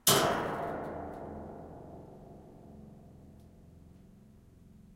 Metal Hit 12
A bunch of different metal sounds. Hits etc.
MetalHit, Steampunk, Weapon, Sword, Metal, Machinery, Industrial